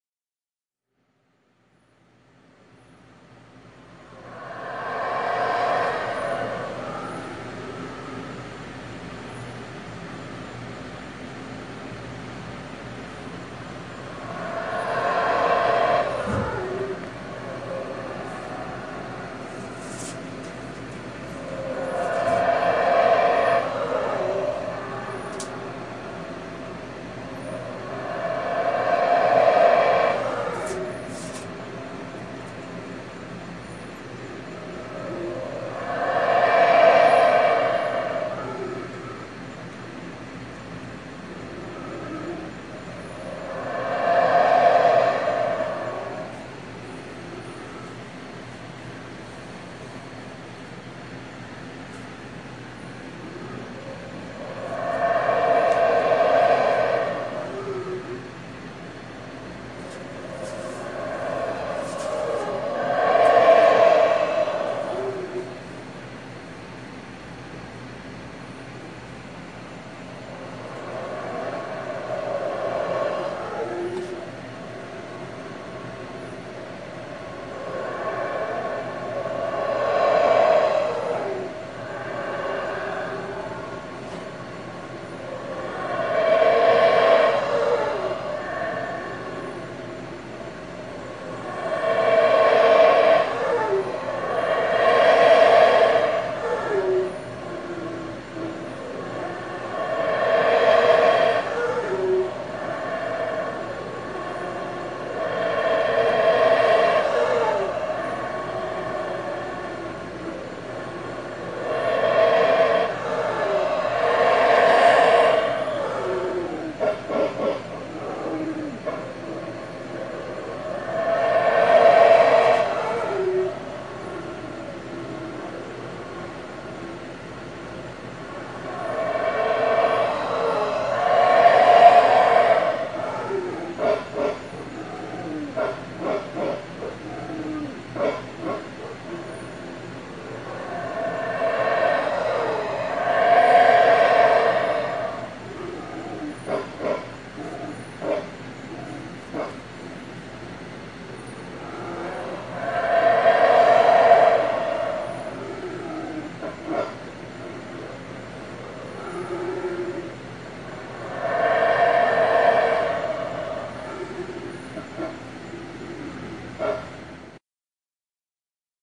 In Guatemala jungle at early hours, you could be wake up by this terrifying sound, the howler monkeys call.
Monkey, Guatemala, Howl, Jungle